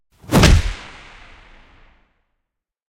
Whoosh to HIT 1
Ready to go designed sound.